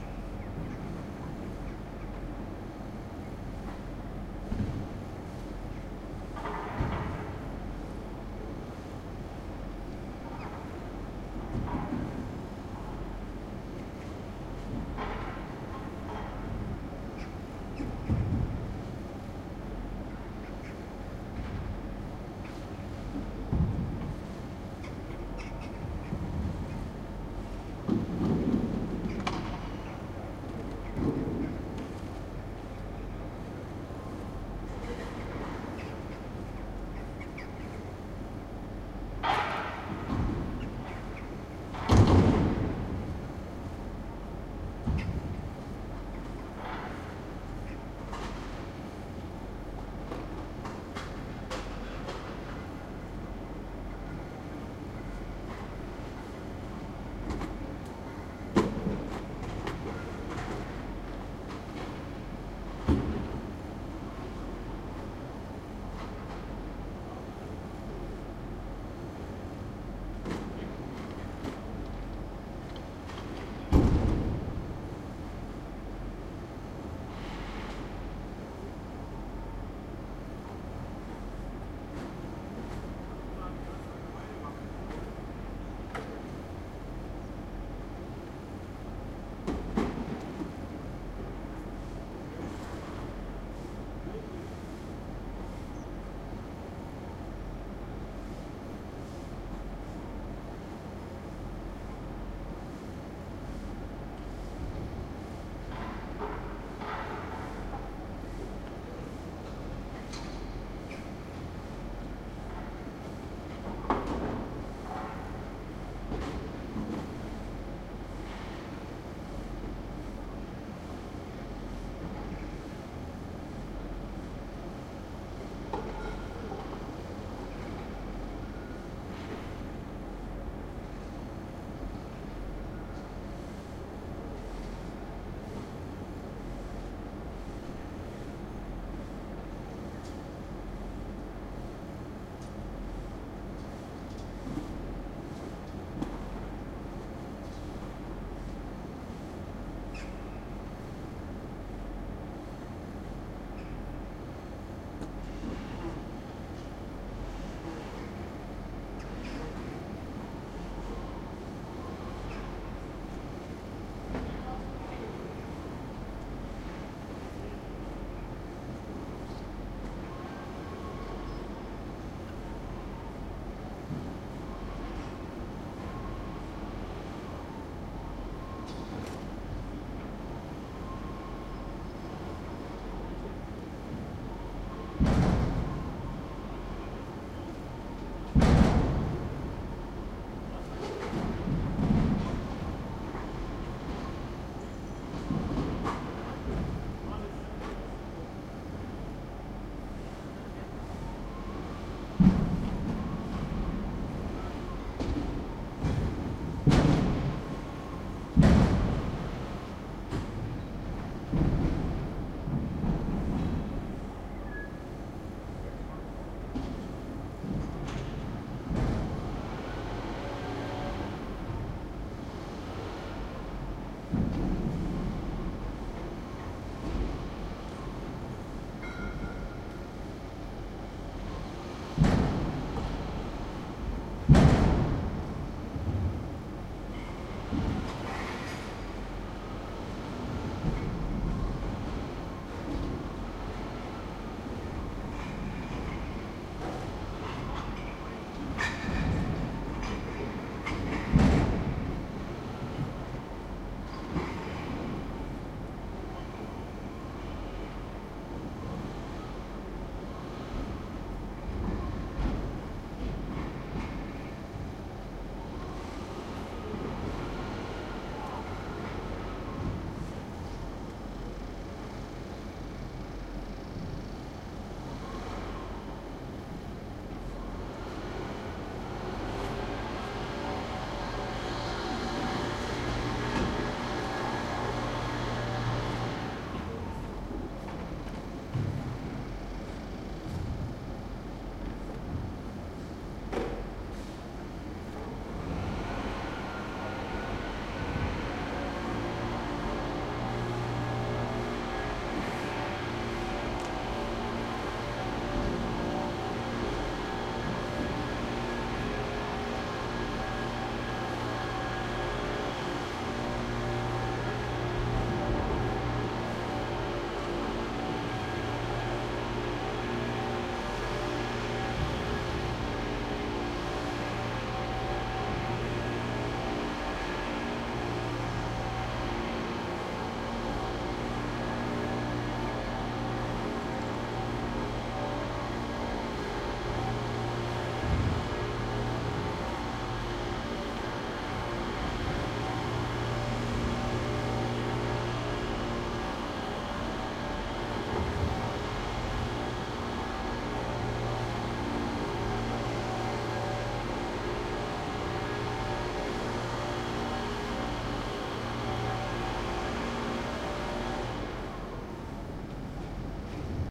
These recordings were made at the annual "Tag der Sachsen" (Saxony Fair) in Freiberg. Recordings were done on the main market square (Obermarkt), where a local radio station had set up a large stage for concerts and other events.
Recording was done with a Zoom H2, mics at 90° dispersion.
This is the early morning after the event, at about 7.30, stage hands are taking down the rigging, workers are clearing away trash and loading trucks. During the recording, someone starts working with a leaf blower.